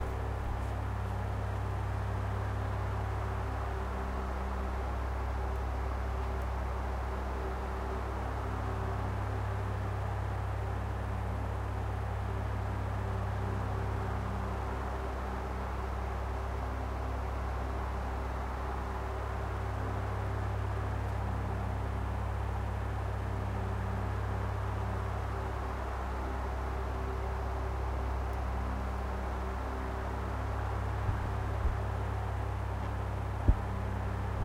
Recording of an electric fan. Could provide ambient background noise for machinery